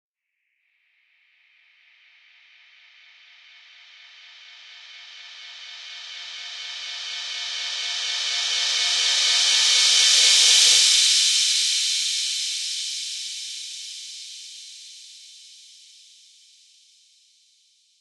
Rev Cymb 6 reverb
Reverse Cymbal
Digital Zero
fx, cymbal